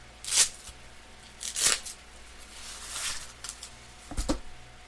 Small woman's wrist brace with (2) velcro latches, undone and removed